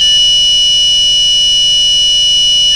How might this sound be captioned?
Quick Tune electronic guitar tuner made in china recorded with a cheap Radio Shack clipon condenser mic. D.
frees, guitar, multisample, sample, sound, tuner